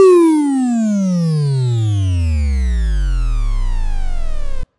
8-bit descending pitch.